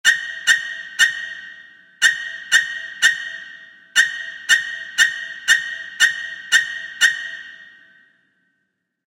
Music Psycho Strikes
Psycho Strikes Music
fear, scary, dungeonsanddragons, dungeons, psycho, rpgs, creepy, horror